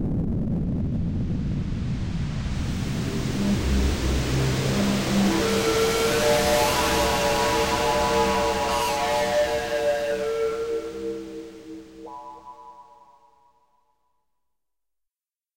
A fast building white noise with added filter sweep and fade-out, layered with a pulsating synthesized bass tone with a slow attack and an affected decay.
Attack, Sweep, Atmospheric, Filter-sweep, Synthesizer, Synth, Noise, White-noise, Atmosphere, Harsh, Bass, Filter